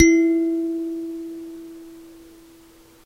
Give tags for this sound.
africa; kalimba; note; single

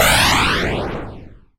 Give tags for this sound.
game; sounds

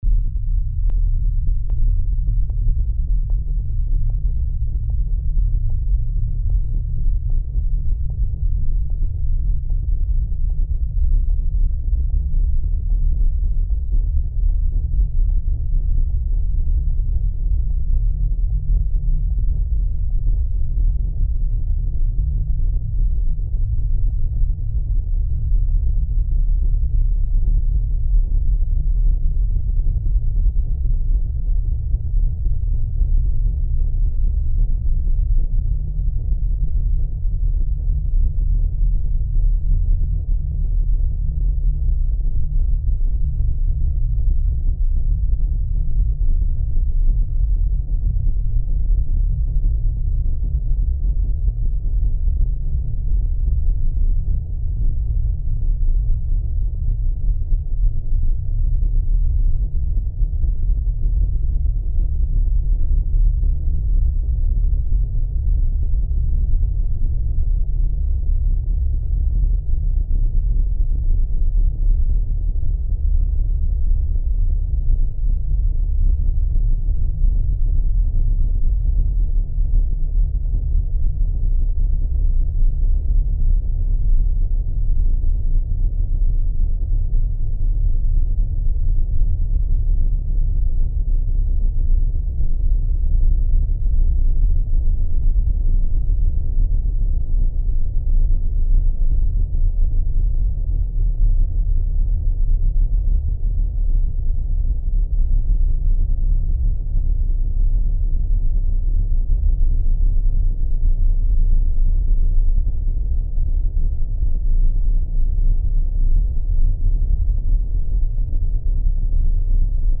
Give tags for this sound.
deep; engine; heavy; machine

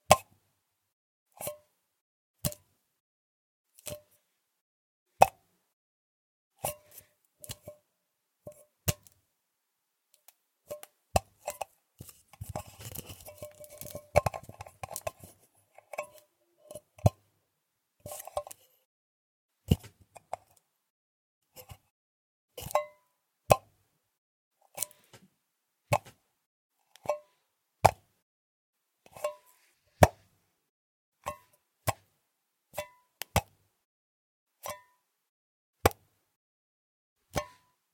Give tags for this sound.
can; clank; clink; finger; foley; metal; metallic; tin-can

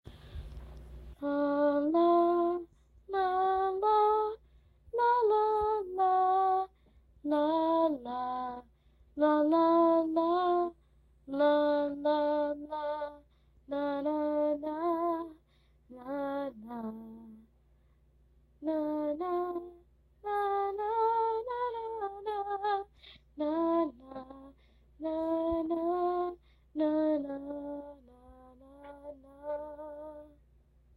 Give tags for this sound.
gree; hum; humming; leaves

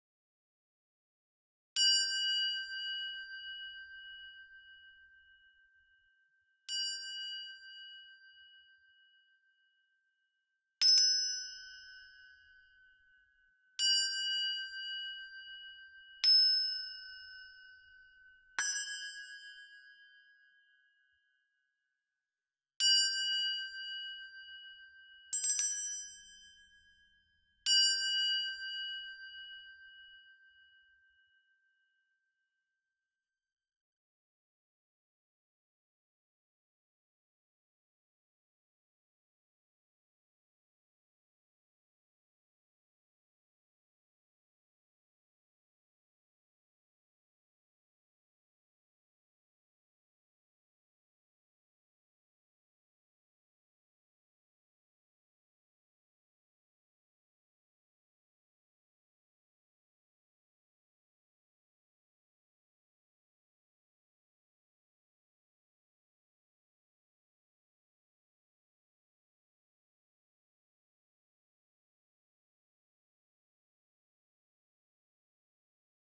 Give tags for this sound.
lanka; Sri

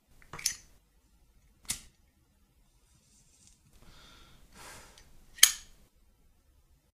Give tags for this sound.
cigarette; lighting; lighter

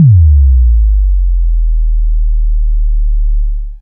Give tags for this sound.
bass-drop; frequency; bass; bassdrop; sine; drop; low; deep; low-frequency

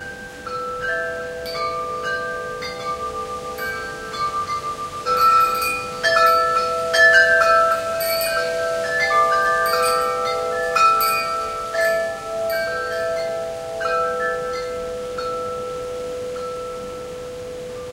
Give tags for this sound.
chimes; wind; music; tinkle; bells; instrumental

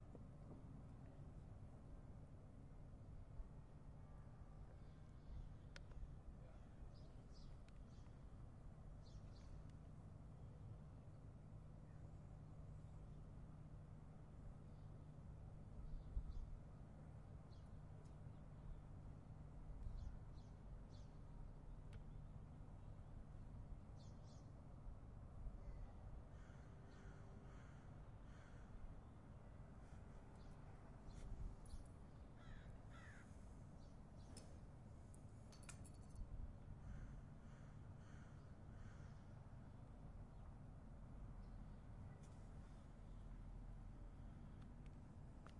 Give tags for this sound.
Ambient; Birds; Field-recording; Outside

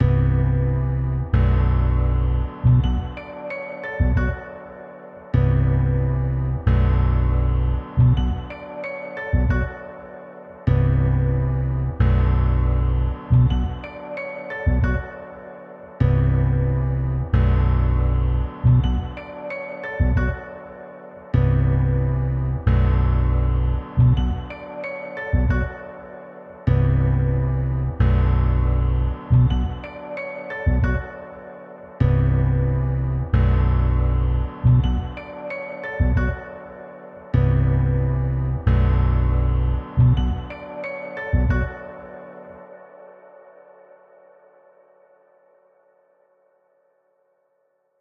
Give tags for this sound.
90; backround; bass; beat; bpm; drum; free; loop; loops; music; percs; piano; podcast

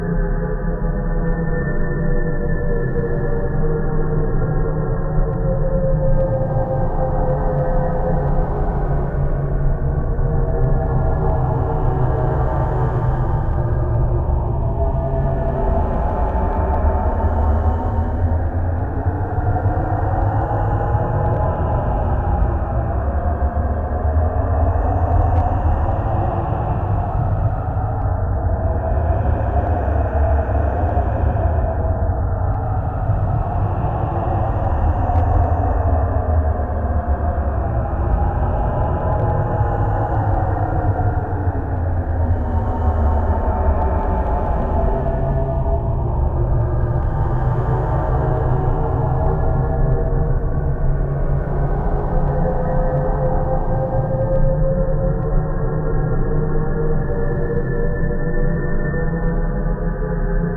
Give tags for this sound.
Weird; movie; Strange; Spooky; Effect; Noise; Alien; Sound; Background; Sci-Fi; Ambient; Electronic; Audio; cinematic; shock; suspense

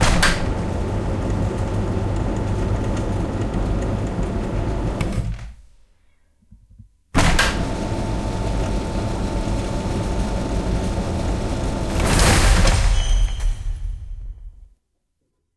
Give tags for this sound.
hoisting; lift

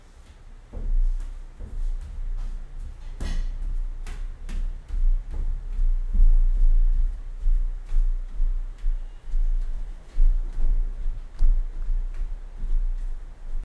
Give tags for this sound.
kid; step; walking; foot; footsteps; footstep; steps; gravel; walk

